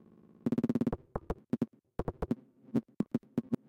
My loop made few days ago processed by SFX Machine, dblue Glitch and filters